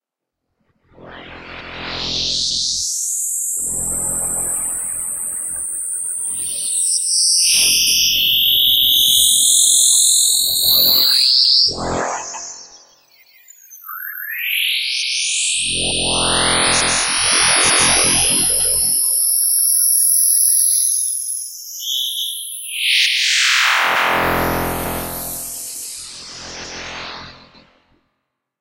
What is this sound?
Fun with Bitmaps & Waves! Sweet little program that converts bitmap photos into sound! Added some reverb and stereo effects in Ableton.